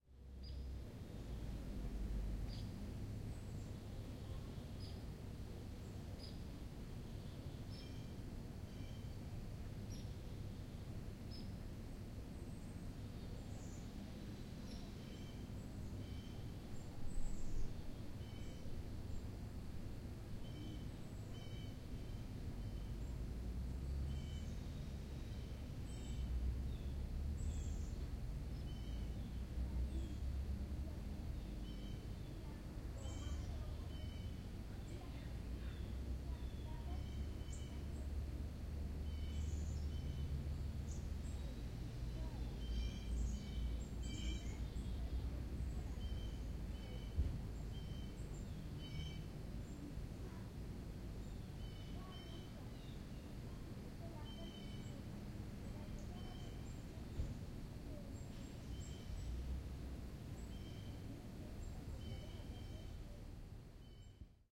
SUBURB MORNING CALM FRONT ST-GAIN 01
suburbs with morning 8am birds front pair of Samson H2 in surround mode - (two stereo pairs - front and back) low level distant sound highway